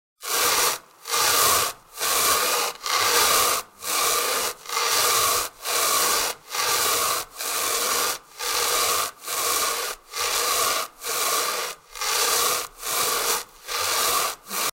Griptape dragged across carpet
dragging the griptape side of a longboard against carpet floor